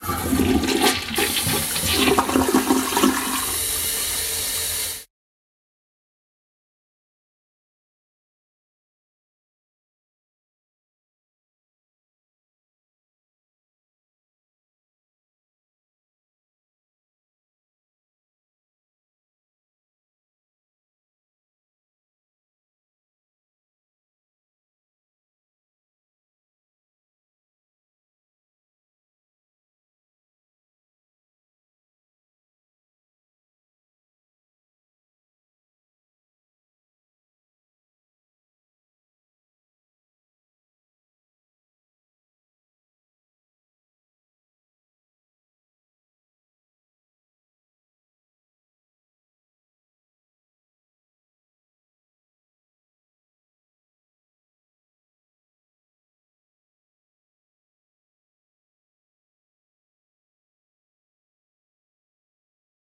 Recorded a toilet flushing.A few seconds of the water tank being filled up is in the recording, too.
restroom, water, toilet, flushing, domestic-sounds, washroom, bathroom, household, flush